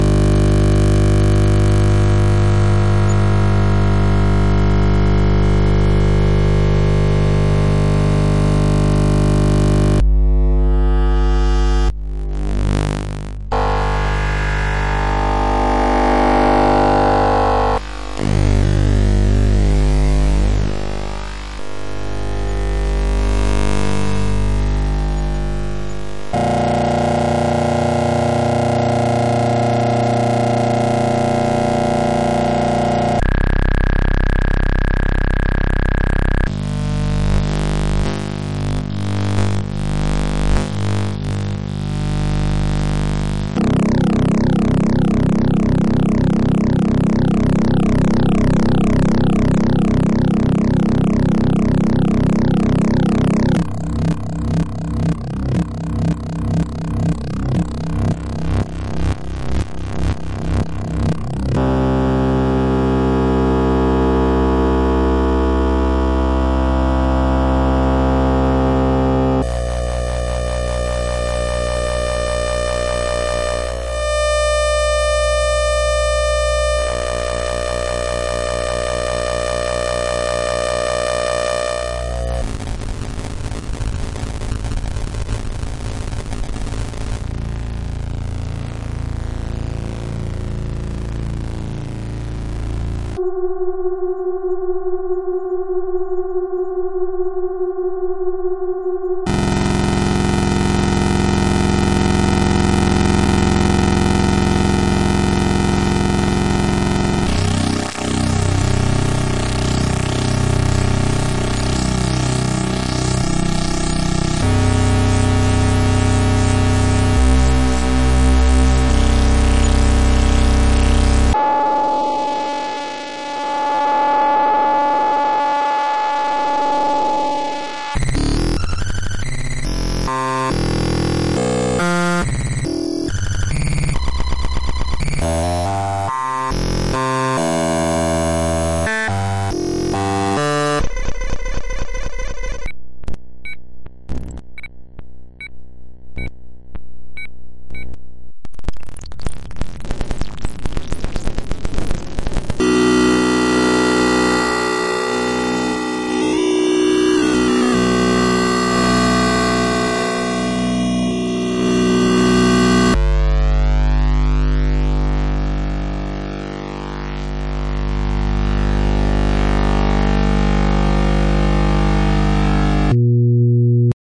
br-laser-vector-reel
BR-Laser Vector Synthesis Reel for Make Noise Morphagene
These sounds have visuals encoded into them at high frequencies.
The Vector Synthesis reel for the Make Noise Morphagene is a collection of different XY samples, to show off Morphagene's stereo sampling capability. The sample material can be displayed on oscilloscopes, modified Vectrex game consoles, ILDA laser displays or oscilloscope simulation software in XY mode. Some splices on the reel can be used as seamless loops.
Here is a video to give a basic idea of the reel
The collection of vectors was arranged by Bernhard Rasinger and includes vector contributions from artists listed below. An important part of this reel is to put the spotlight on working and performing artists utilizing sound signals to draw images as vector art.
Alberto Novello
Andrew Duff
Bernhard Rasinger
Chris King
Derek Holzer
Douglas Nunn
Hansi Raber
Ivan Marušić Klif
Jerobeam Fenderson
Jonas Bers
Joost Rekveld
Philip Baljeu
Philipp Haffner
Robert Henke
mgreel, vectrex, br-laser, lissajous, visualization, vector, morphagene, xy, oscilloscope